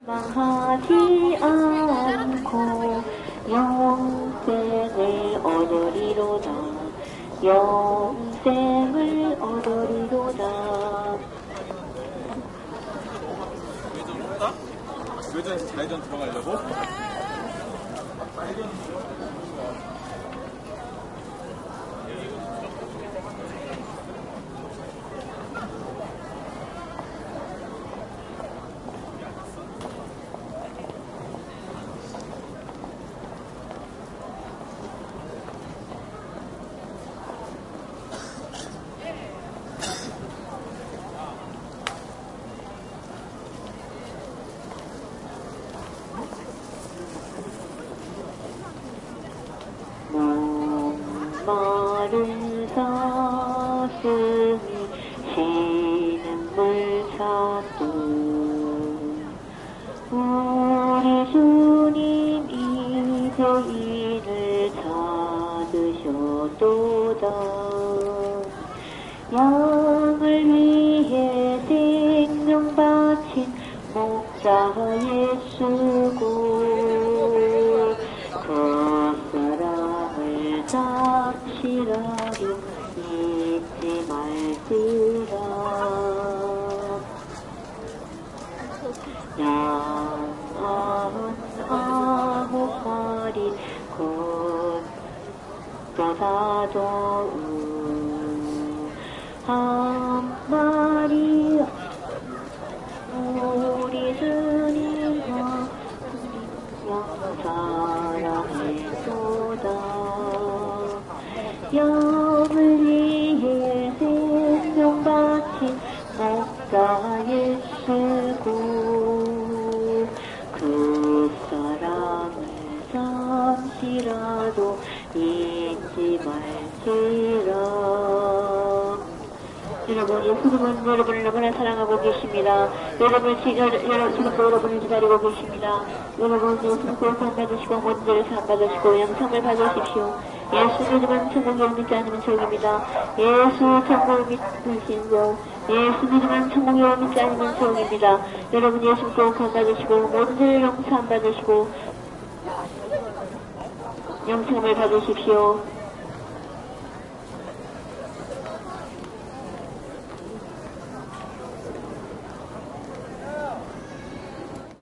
0158 Praying shopping street singing
Woman praying singing in Korean. Shopping street, people talking and walking.
20120212
korean, cough, seoul, music, voice, korea, field-recording